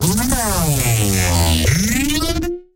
Cutted parts of an audio experiment using Carbon Electra Saws with some internal pitch envelope going on, going into trash 2, going into eq modulation, going into manipulator (formant & pitch shift, a bit fm modulation on a shifting frequency at times), going into ott